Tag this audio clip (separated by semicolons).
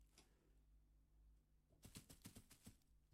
steps; Quick; step; walk; foot; walking; running; footsteps; feet